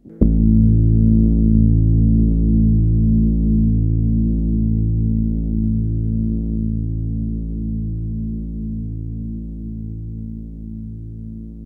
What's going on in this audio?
low rumble
sounds kinda like War of the worlds machine horns
rumble, low, chord, bass